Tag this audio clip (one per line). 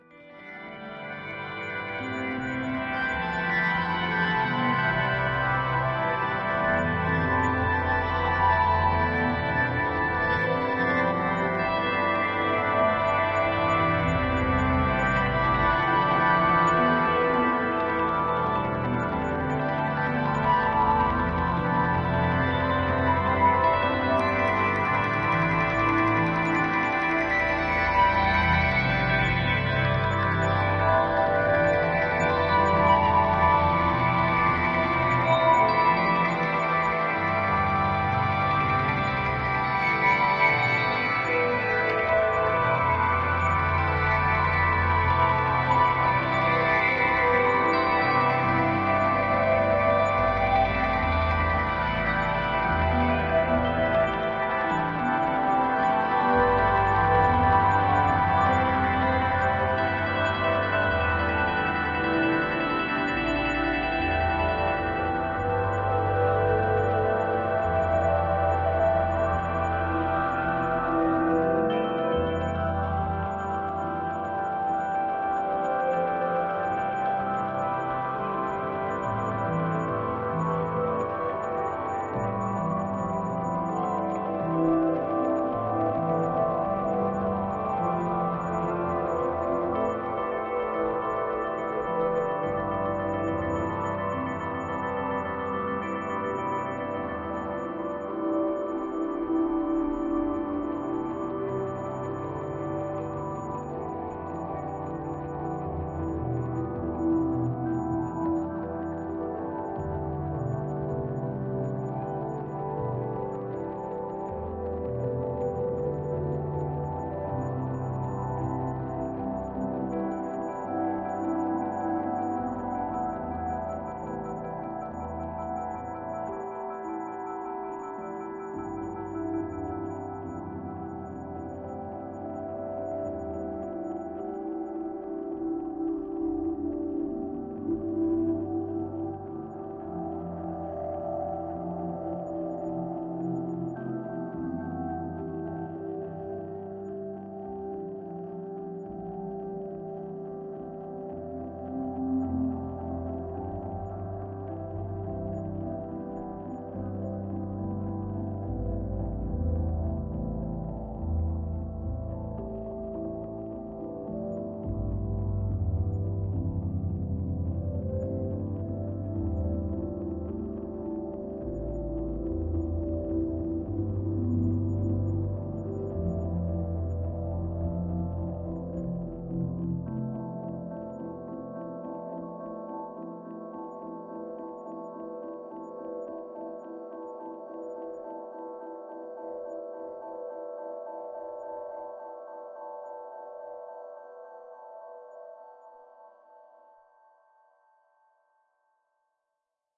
effect
effects
electronica
music
one
piano
production
sample
short
shot
single
Smooth
synth